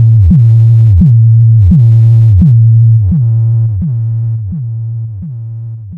Tfdbk-009-bass
A pleasant and interesting bass sound. Might even be musically usefull...
Created with a feedback loop in Ableton Live.
The pack description contains the explanation of how the sounds where created.
bass, distortion, Frequency-shifter, rhythmic, beep, bleep, feedback, pitch-tracking